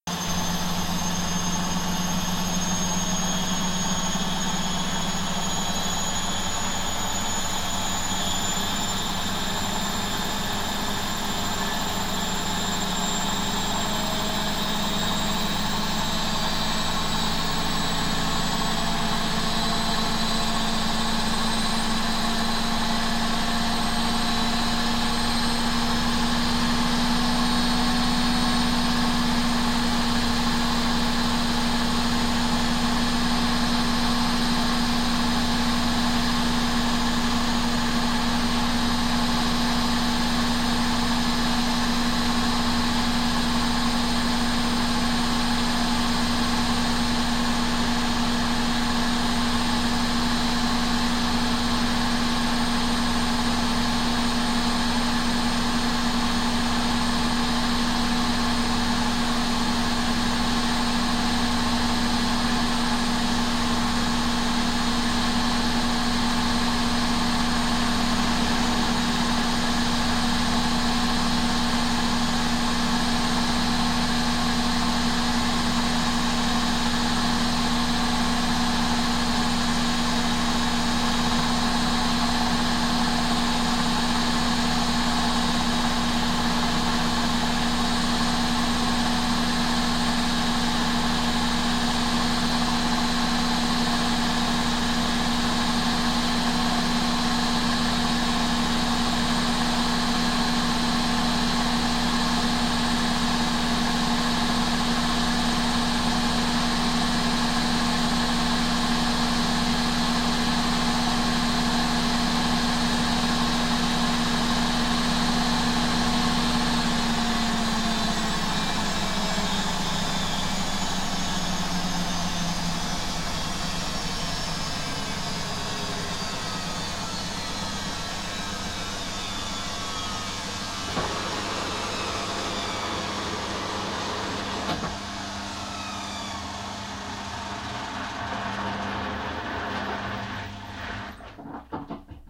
Washing machine spin cycle.